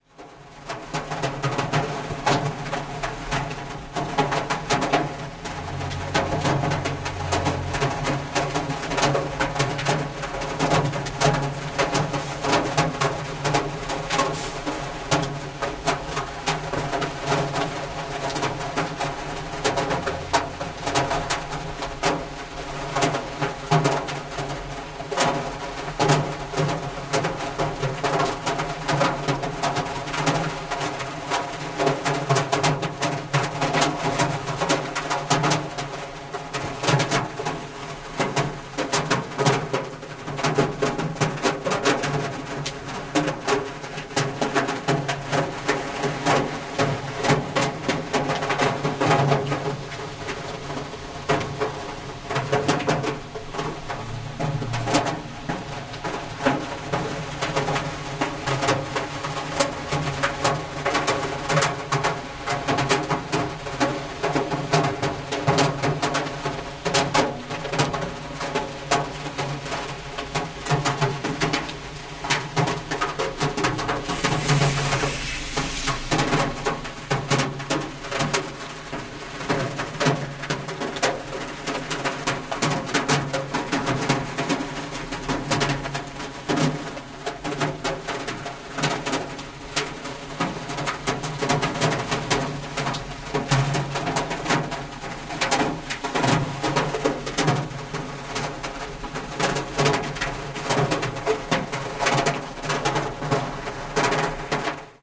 Pluja al 4L
This is the sound of rain drops hitting the metallic roof of a Renault 4, from inside.